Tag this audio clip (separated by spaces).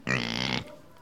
animal animal-sounds farm field-recording grunt pgrunt pig piglet snort snorting